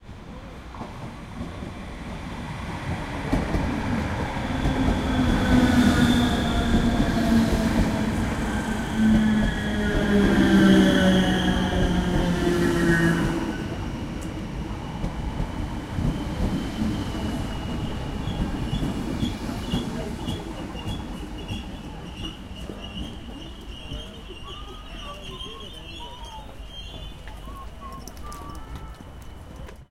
DART train arrives at the platform
Dublin 2018
dublin, station, rail, arrival, platform, ireland, train